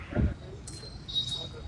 Registro de paisaje sonoro para el proyecto SIAS UAN en la ciudad de santiago de cali.
registro realizado como Toma No 03-pito 2 plazoleta san francisco.
Registro realizado por Juan Carlos Floyd Llanos con un Iphone 6 entre las 11:30 am y 12:00m el dia 21 de noviembre de 2.019
03-pito, 2, No, Of, Paisaje, Palmira, Proyect, SIAS, Sonoro, Sounds, Soundscape, Toma